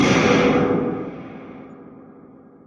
big drum 001
This sample was created by passing a synthesized perc sound through a VST amp simulator with a high overdrive setting.
artificial, drum, metallic, perc, percussion, processed, realistic, reverb, synthetic, synth-perc, synthperc, vst